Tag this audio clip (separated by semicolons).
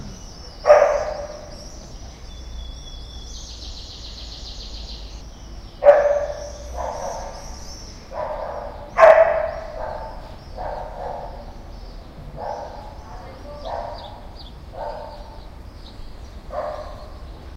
andalucia; barking; bird; birds; dogs; field-recording; people; sevilla; song; streetnoise; woof